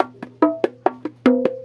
bongo martillo 145bpm
Approximately 145 bpm, straight martillo rhythm on the bongos.
martillo, percussion, drums, bongo, drum, latin, loop, 145-bpm, beat, percussion-loop, rhythm, bongos